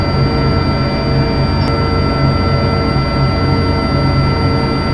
Created using spectral freezing max patch. Some may have pops and clicks or audible looping but shouldn't be hard to fix.
Atmospheric, Everlasting, Still, Perpetual, Sound-Effect, Freeze, Background, Soundscape